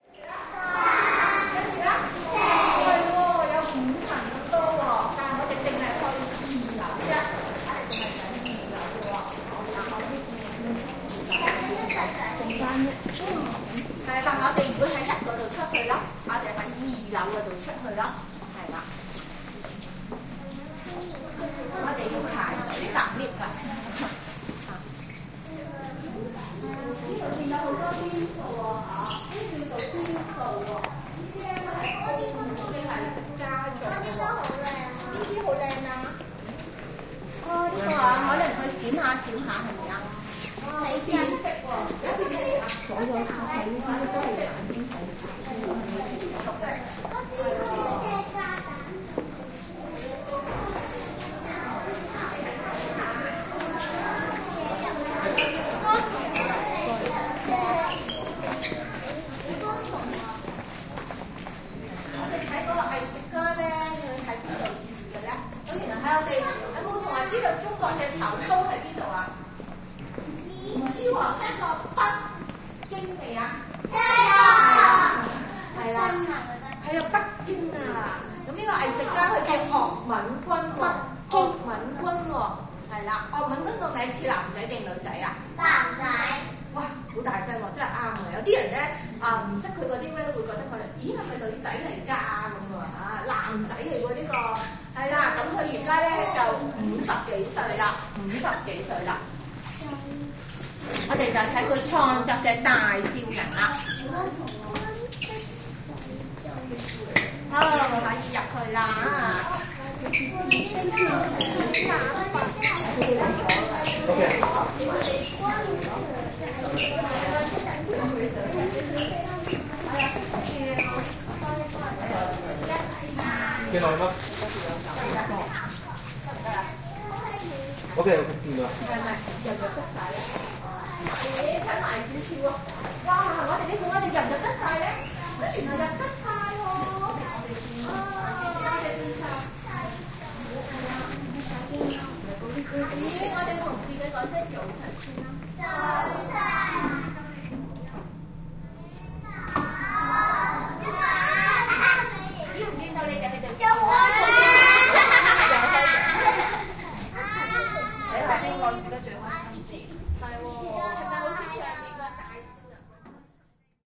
Group 3 (2014) - Architecture Field Recording (Children at Cultural Center)
Field Recording for the “Design for the Luminous and Sonic Environment” class at the University of Saint Joseph - Macao SAR, China.
The Students conducting the recording session were: Yara & Keena